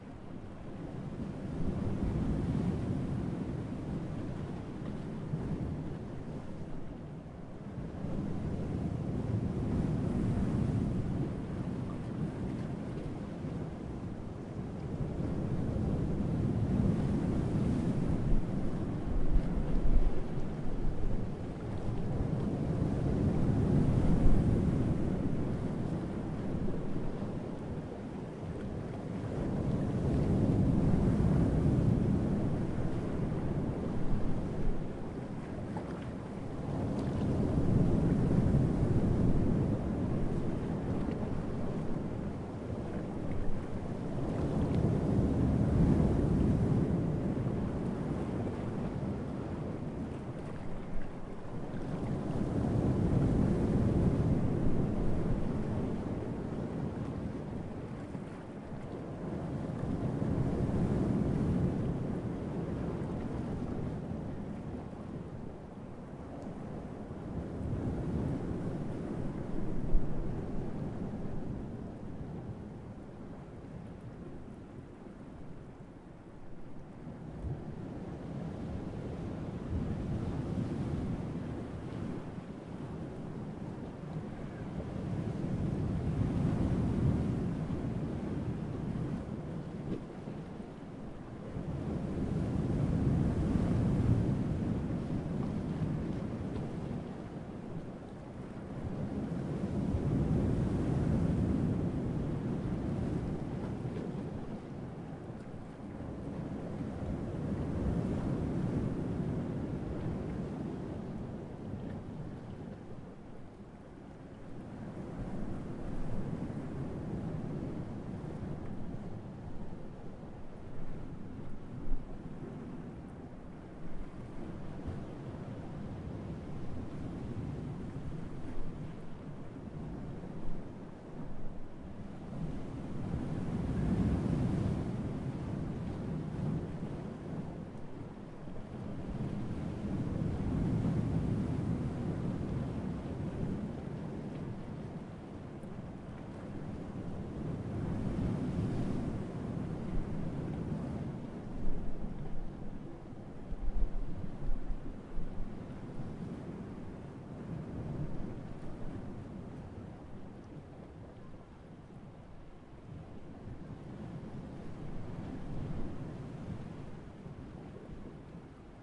Recorded in Quercianella, Livorno, Italy
ambience, ambient, bay, beach, italy, leghorn, livorno, mediterranean, nature, ocean, rocks, sea, soundscape, stereo, water, waves